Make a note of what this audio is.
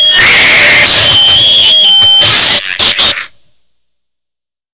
harsh noise blast
The sample is a short blast from the last couple of seconds of a noise track I just completed... A lot of neat, varried textures and such... the very end is me screaming while rapidly turning a distortion on and off.
feedback, harsh, noise, scream, vocal